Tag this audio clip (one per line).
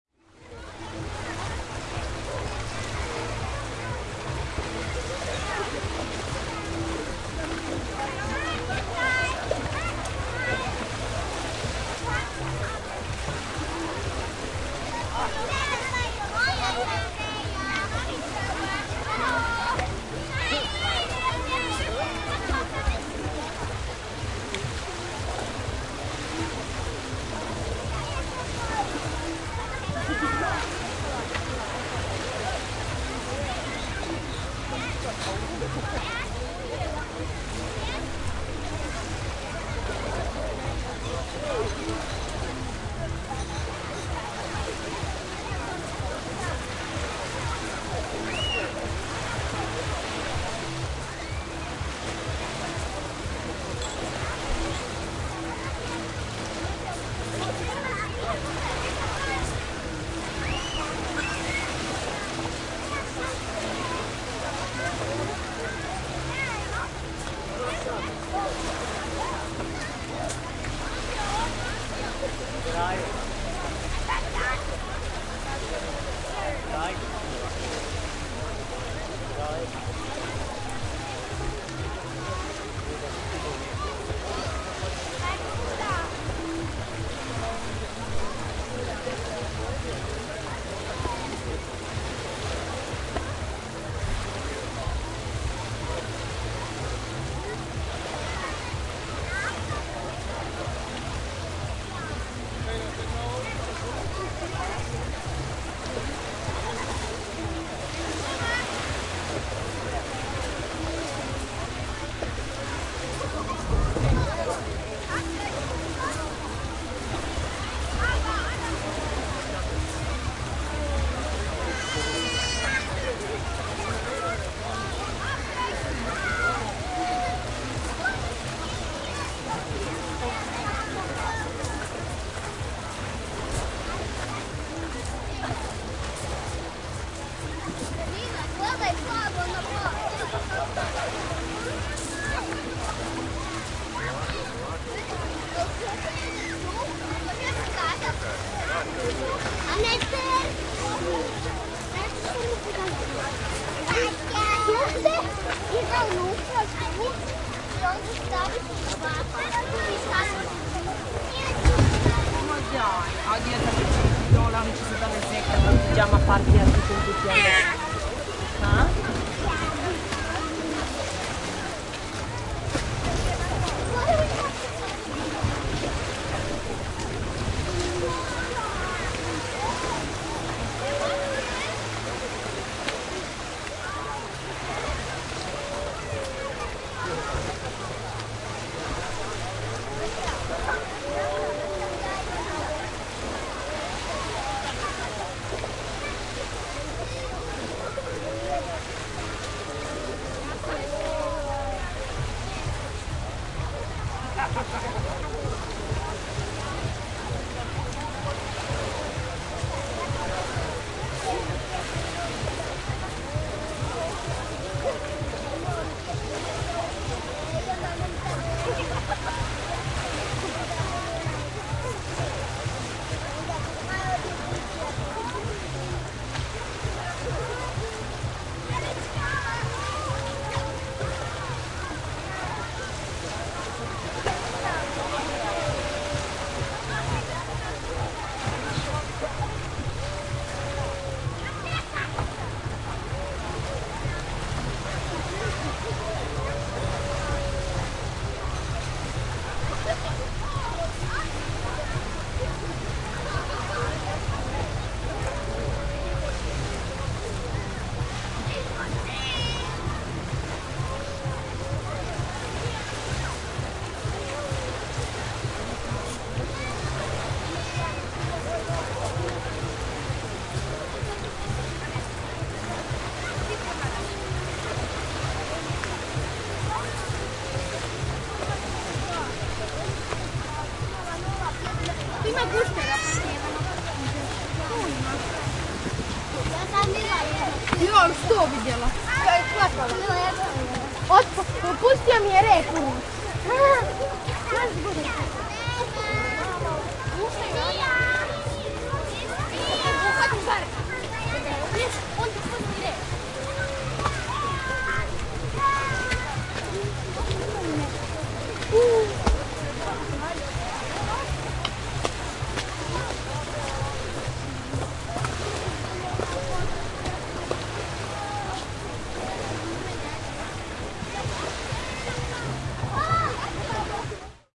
croatia
dramalj
kids
sea
summer